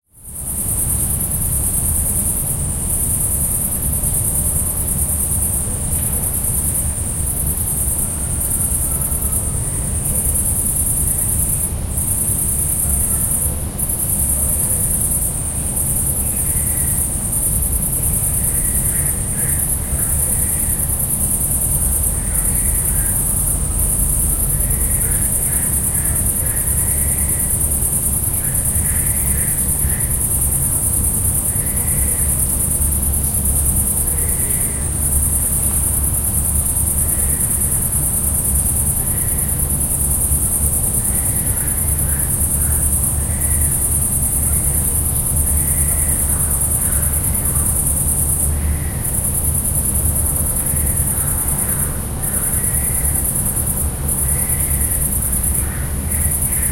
tata hungary near the coast of lake cseke
Recorded near the coast of lake Cseke, Tata, Hungary, on 2007-07-09. You can hear cicadaes, distant tree-frogs and some city noise. Normalized, otherwise unprocessed.
tata, frogs, cicadaes, night, summer, hungary